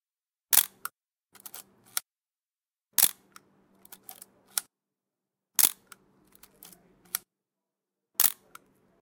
Analog Camera Shutter
An audio recording of an analog camera